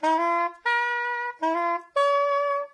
Non-sense sax.
Recorded mono with mic over the left hand.
I used it for a little interactive html internet composition:
sax, loop, saxophone, melody, soprano-sax, soprano